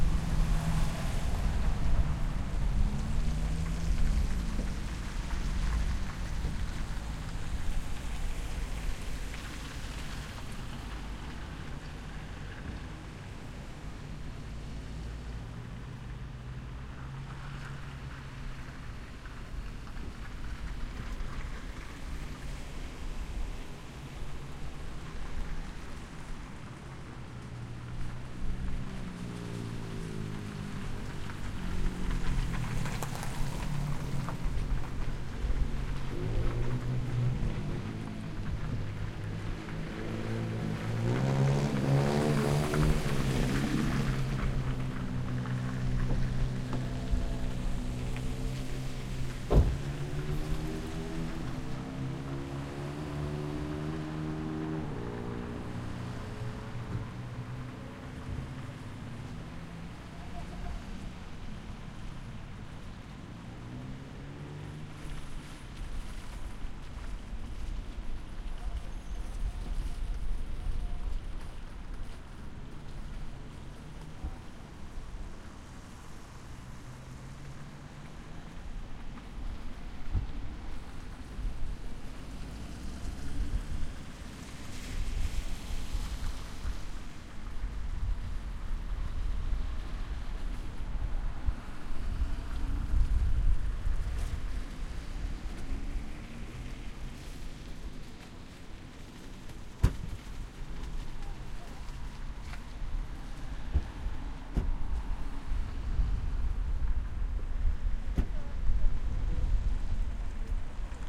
Parkeringen Willys
A recording outside the local food store at the parking lot. Recorded in stereo